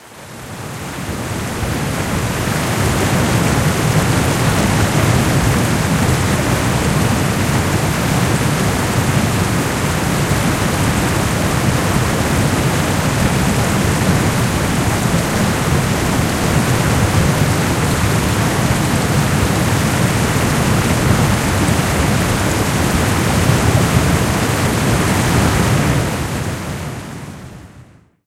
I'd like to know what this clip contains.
Water Flood enhanced
Multiple recordings of the Jordon River layered and stereo inhanced